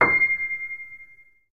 PIANO MED C7
MISStereoPiano
These were made available by the source listed below.
You may also cite as a reference, link to our page from another web page, or provide a link in a publication using the following URL:
Instrument Piano
Model Steinway & Sons
Performer Evan Mazunik
Date November 5 & 27, 2001
Location 2017 Voxman Music Building
Technician Michael Cash
Distance Left mic 8" above center bass strings
Right mic 8" above center treble strings
grand, steinway